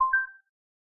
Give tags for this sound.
beep tone

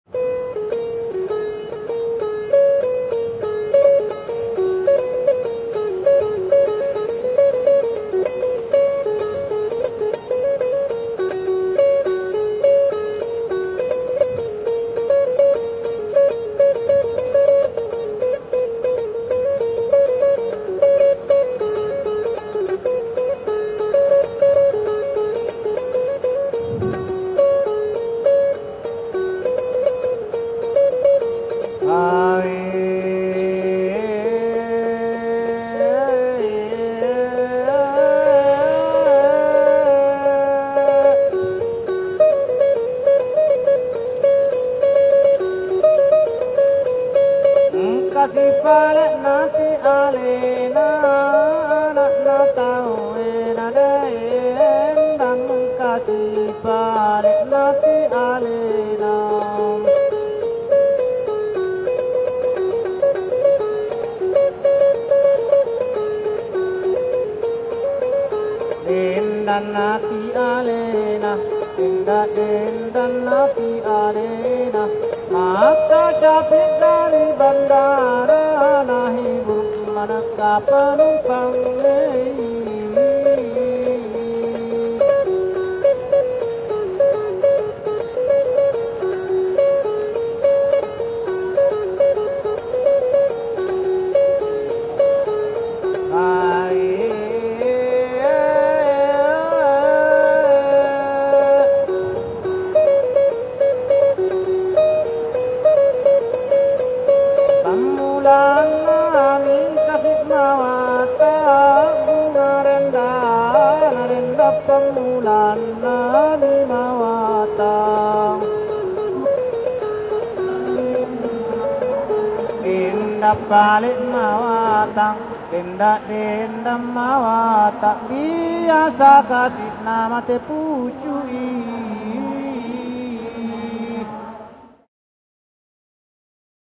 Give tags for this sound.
akkelong Arab Asia Bahasa Bugis Celebes ethnic ethno field-recording folk harp indigenous Indonesia instrument islam kacaping lute Makassar music pakacaping Pakarena port singing stringed strings Sulawesi traders traditional vocals

